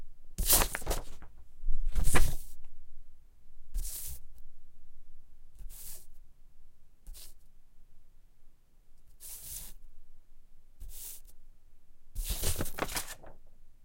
Paper Manipulation On Glass
Recorded on an SD 702 with an SM81 and a cheap akg SDC can't remember which one just wanted variety. Not intended as a stereo recording just 2 mic options.
No EQ not low end roll off so it has a rich low end that you can tame to taste.
Class-table-top, leaf, page, Paper, Shuffle